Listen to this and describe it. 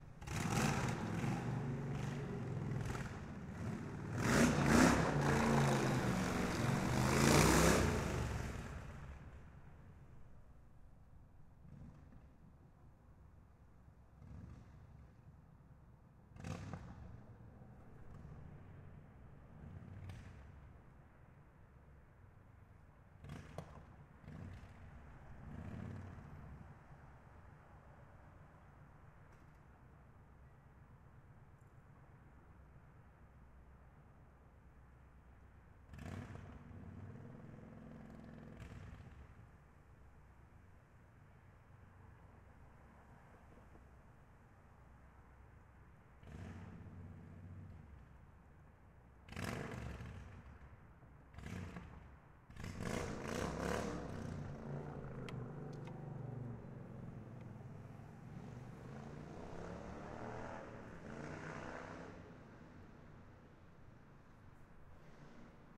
Motorcycle Revving
It's a motorcycle...revving
cycle, echo, engine, loud, motor, rev